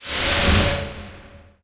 Sliding Trailer Woosh
In Audacity, I paulstretched some noise and then added sliding shift (200% speed at end, -4% pitch at end), reversed, did the same sliding shift effect, added silence behind the sound and applied default gverb settings, normalized.
air, amazing, buzzword, buzzwords, cast, caster, cinematic, easy, epic, fake, fire, fireball, hot, illusion, illusions, impression, impressive, magic, magician, simple, slider, spell, steam, stupid, swish, transition, water, whoosh, wizard, woosh